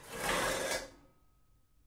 pots n pans 12
pots and pans banging around in a kitchen
recorded on 10 September 2009 using a Zoom H4 recorder
kitchen, pans, pots